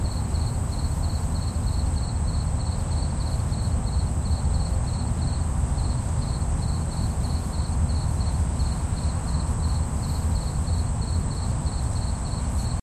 Noisy creatures recorded with the Olympus DS-40/Sony Mic.
animal, bird, birdsong, field-recording, song, walking